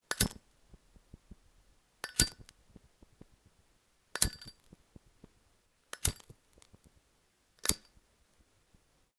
zippo light 5shots
Sounds made by lighting a metal Zippo lighter.
Recorded with an Oktava-102 microphone and Behringer UB1202 mixer.
fire, flame, ignite, lighter, spark, zippo